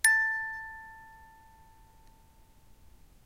one-shot music box tone, recorded by ZOOM H2, separated and normalized